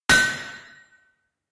Large Anvil & Steel Hammer 3
A stereo recording of a single strike with a steel hammer on a piece of hot steel on a large anvil mounted on a block of wood. Rode NT4 > FEL battery pre amp > Zoom H2 line in.
hammer, tapping, anvil, banging, clang, xy, smithy, steel, tool-steel, steel-hammer, stereo, metal